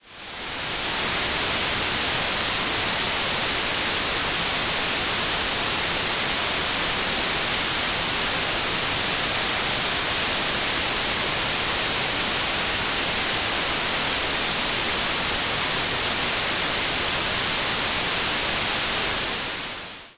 white-noise
basic white noise generated in audacity
background, noise